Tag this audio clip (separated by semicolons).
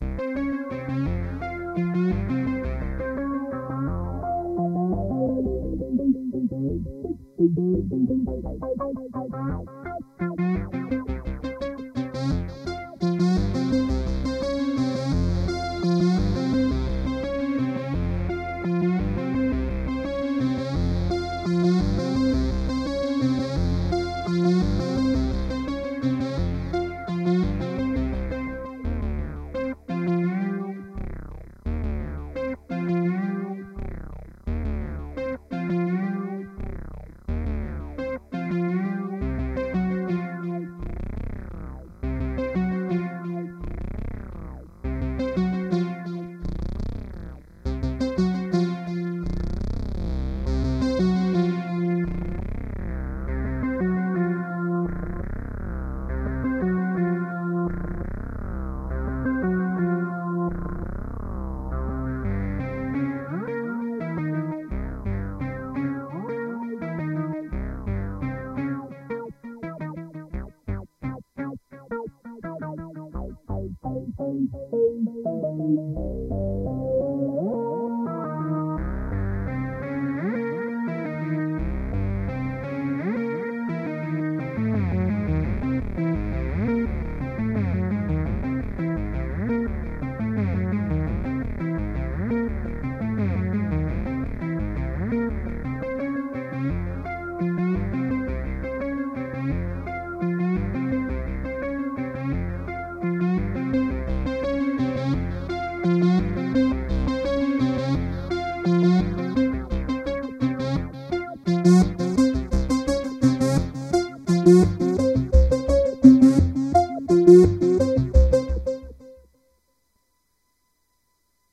90-bpm; techno